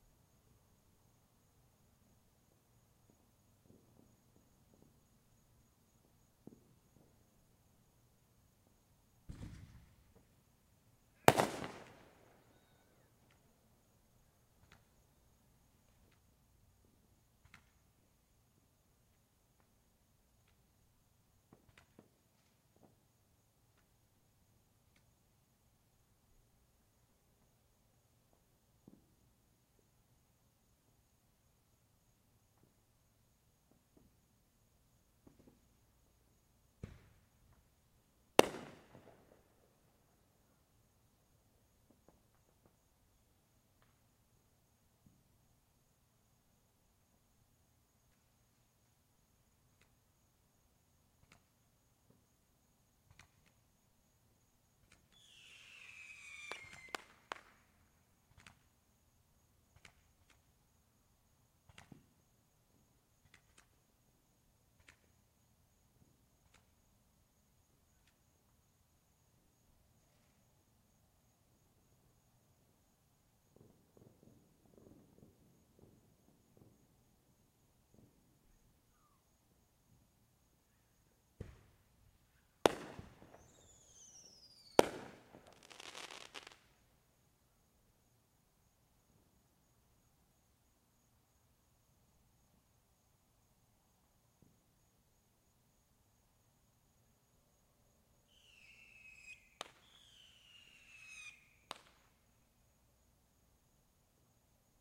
Fireworks recorded with laptop and USB microphone as things wind down.
4th, field-recording, firecracker, holiday, independence, july